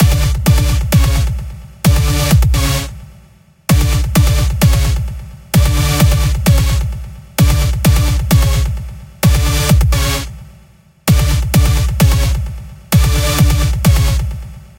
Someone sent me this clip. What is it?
Ld Rave Theme
theme, rave, sfx, ld, music